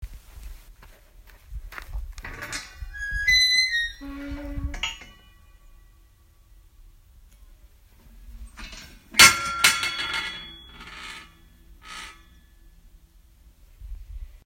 Opening-and-closing-wildlife-fence-Texel
Recording I made on the island Texel, Netherlands, of opening and closing of a metal wildlife fence.
metal, field-recording, animal-fence, fence